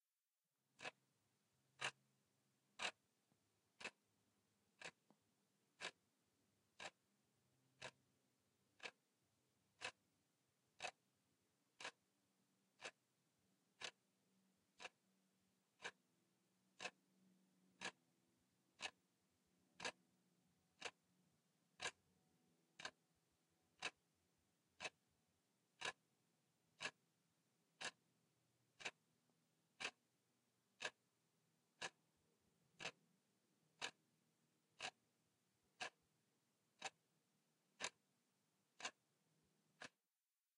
clock tick
An electric clock on the wall ticking. Very little ambient hiss.
tic-tok, ticking, clock-ticking, clockwork, ticks, tic, time, clock, timepiece, tick-tock, tok, clock-tick, ambience, tick, wall-clock